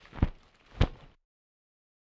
sound of wings flapping